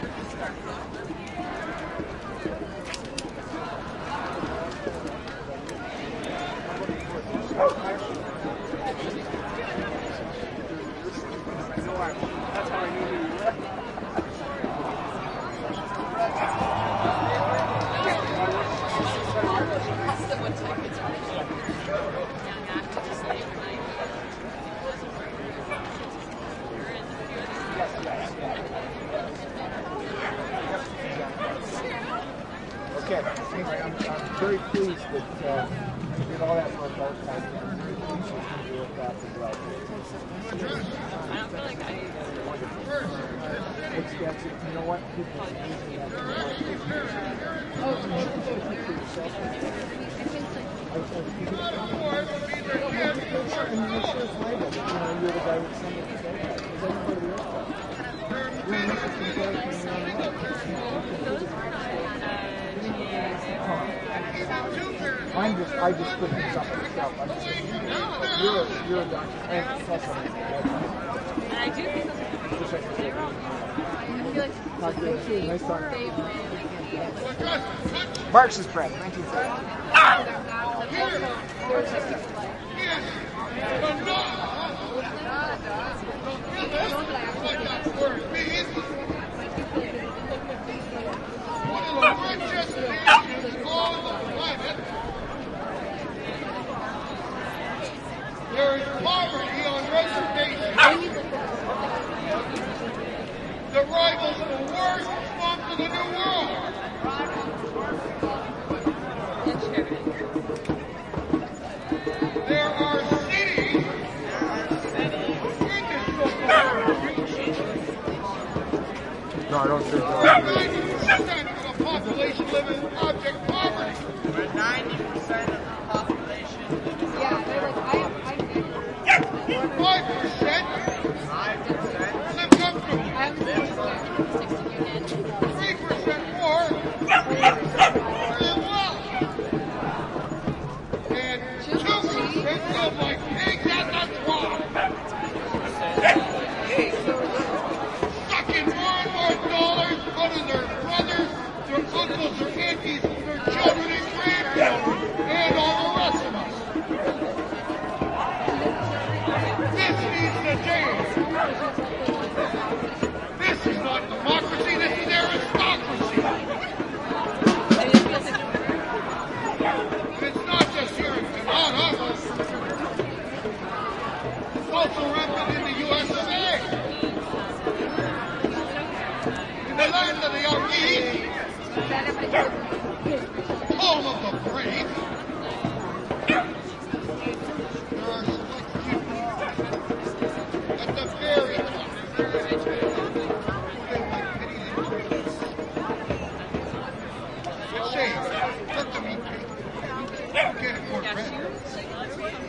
Occupy Toronto at New City Hall 22 Oct 2011
Field recording made at the Occupy Toronto demonstration which gathered in Nathan Phillips Square in front of New City Hall on 22 Oct 2011. "Human mic" technique is in use.
The Occupy Toronto base-camp was at nearby St James Park at this time.
Roland R05 sound recorder and Sennheiser MKE400 stereo microphone.
Canada, crowd-sounds, field-recording, occupy-protest, occupy-Toronto, street-protest, Toronto, Toronto-city-hall